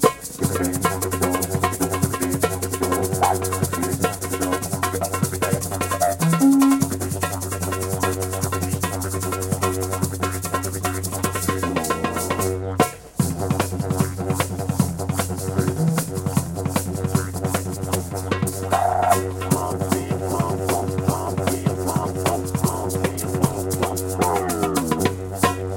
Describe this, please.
Street Didjiridu cut 1
Capture this sound by ocasion. Group of people was playing on street in Kiev, Ukraine. They are cool )
bongo, group, people, music, musician, didjeridu, field-recording